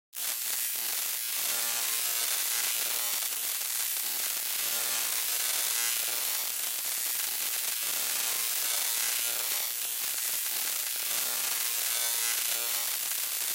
The result of experiments with synthesis, non-standard use of delay and granulator. Enjoy it. If it does not bother you, share links to your work where this sound was used.